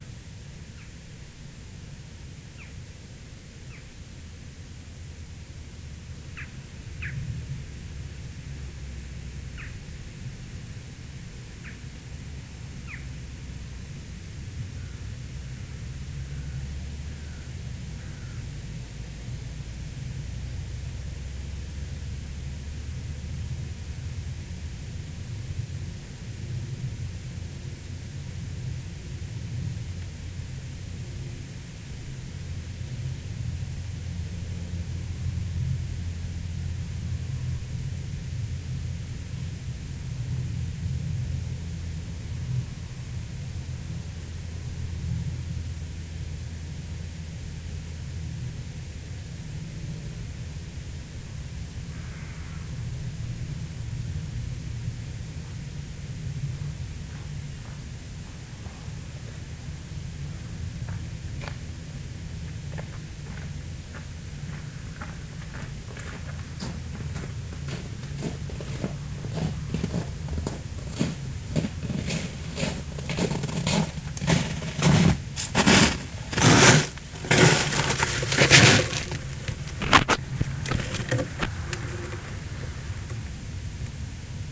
Winter afternoon footsteps in snow
It's me, walking in the snow towards the recorder. It's a winters afternoon in Denmark and we've just had a bit of snow again. The machinery from some farmers near by, is making an almost not noticeable low dronelike noise, in the background.
Recorded with a Sony HI-MD walkman MZ-NH1 minidisc recorder and a pair of binaural microphones. Edited in Audacity 1.3.4 beta